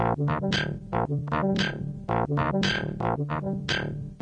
A kind of loop or something like, recorded from broken Medeli M30 synth, warped in Ableton.
broken, motion, loop, lo-fi